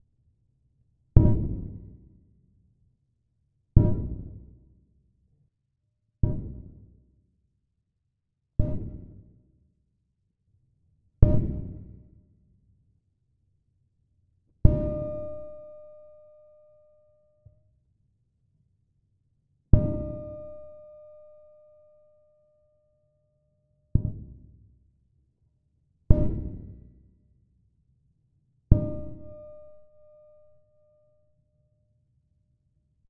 Heavy Steel Pipe 01 Under Water
The file name itself is labeled with the preset I used.
Original Clip > Trash 2.
cinematic, clang, distortion, drop, hit, horror, impact, industrial, metal, metal-pipe, percussion, resonance, ringing, scary, sci-fi, smash, steel, steel-pipe